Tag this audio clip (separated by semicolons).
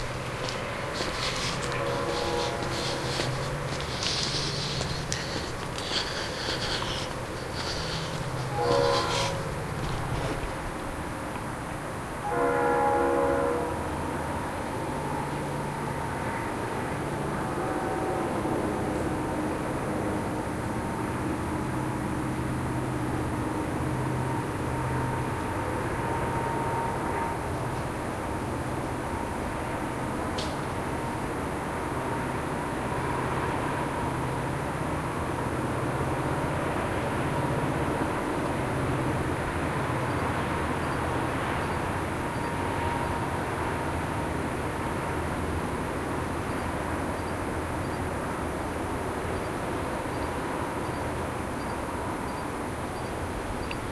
walking; field-recording; train; ambient